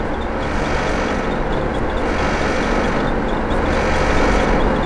rattling and squeaky machine
a low whirring sound with a squeaky loop on top, at the back of a university restaurant. No idea what produces that.
Recorded on the fly with a crappy mic, but usable with a little cleanup.
whir, machine, industrial